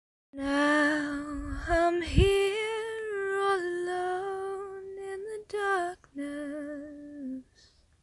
'Now I'm here, all alone in the darkness' Female Vocals
A female voice singing the lyrics 'Now I'm here, all alone in the darkness'. Hopefully I'll be able to get the background noise cleaned again, as I can't do it myself.